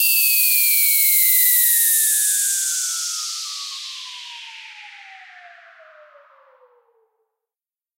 Noisy Neighbour 1
rising, sound-effect, riser, sweeper, sweep, sweeping, effect, fx